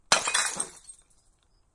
1 quick, high pitched bottle crash, tingle, liquid, hammer.